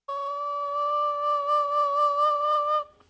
This is Ryan Driver playing the balloon for a recording project.Recorded November 2015 unto an Alesis Adat .